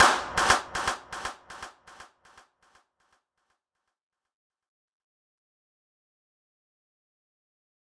Clap 2 - Ultra Reverse Reverb and Delay
This is a record from our radio-station inside the rooms and we´ve recorded with a zoomH2.
Clap, Delay, Reverb, Reverse, Ultra, ZoomH2